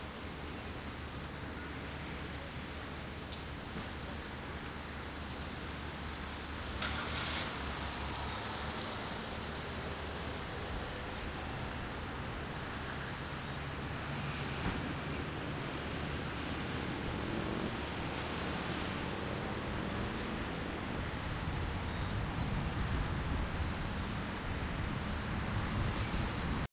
virginiabeach wawa13south2
Filling up the tank in Virginia Beach as I leave the cool part of the country and head to swampy heat land recorded with DS-40 and edited in Wavosaur.